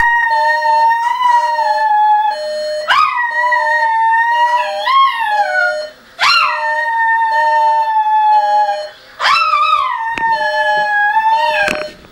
Shaggy howls at the alarm clock recorded with DS-40 with the stock microphone for the last time.
alarm, ambiance, buzzer, clock, dog, howl